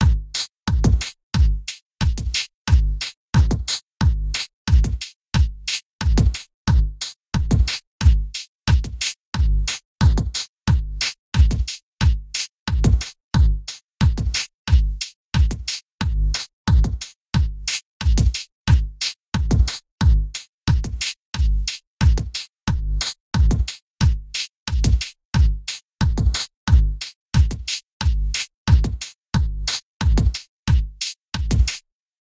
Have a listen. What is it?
Bobby 2 drums track
Drums track of Bobby 2 instrumental